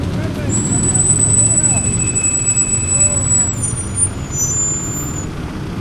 high-pitched sound made by a braking vehicle. Olympus LS10 internal mics
braking, field-recording, screech, squeal